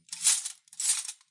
I recorded these metal sounds using a handful of cutlery, jingling it about to get this sound. I was originally planning on using it for foley for a knight in armor, but in the end decided I didn't need these files so thought I'd share them here :)